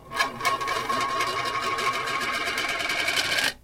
A thin metal disc of about 8cm radius spinning to rest on a wooden floor.
circle, disc, roll, spin, wobble